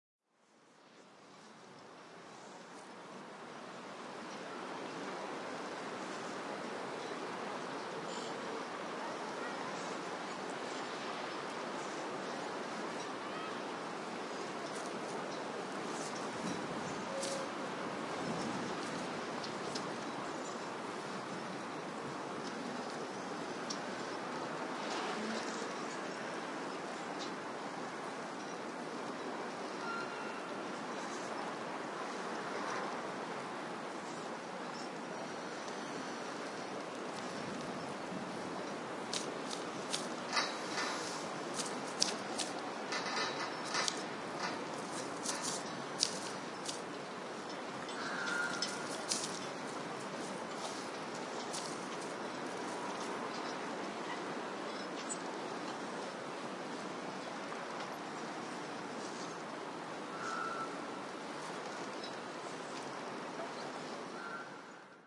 Boats stranded on jetty
This register contains the movements produced by the air on small boats stationed on the pier. It is a curious and disturbing environment.
Boats, Jetty, sounds, thriller